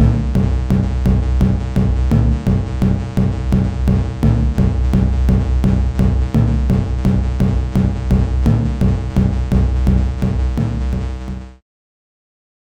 DOIZY HADRIEN 2018 2019 FrenchTechnO
Tonight, your best mate got you tickets for a wicked party in the woods. There's a new French musician playing. You try to get into it...
I am still far from being a musician but I tried to emulate the kind of music I used to like. I combined three "synthetic" sounds I generated thanks to Audacity. First, a rhythm track made from a cow bell sound at a 184 bpm tempo and 6 pulses by beat. The pitch is pretty low to avoid to keep the bell sound. Then I added another low-pitch sinusoidal sound with a Paulstretch effet for the bass. And finally, I used a distortion effect ( hard overdrive) on a higher-pitch sound to get this result.
Code typologie de Schaeffer : X ''
Masse : Sons "cannelés"
Timbre harmonique : sombre
Grain : plutôt lisse
Allure : vibrato sur un des sons.
Dynamique : attaque abrupte
Profil mélodique : variations scalaires et serpentines
trance, techno, club, rave